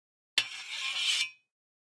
Sliding Metal 09
blacksmith, clang, iron, metal, metallic, rod, shield, shiny, slide, steel